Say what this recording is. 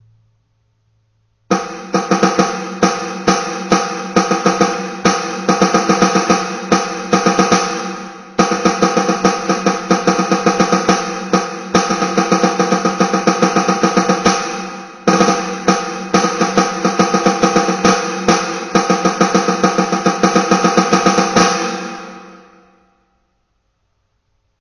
This is from my Roland TD7 electronic kit set on the reverb patch and I just played whatever came into my head. Thanks. :^)
Beat, Reverb, Snare, With